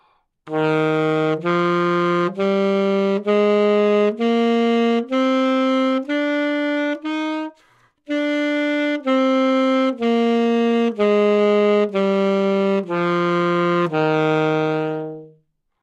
Sax Alto - D# Major
Part of the Good-sounds dataset of monophonic instrumental sounds.
instrument::sax_alto
note::D#
good-sounds-id::6523
mode::major
good-sounds, alto, neumann-U87, scale, sax, DsharpMajor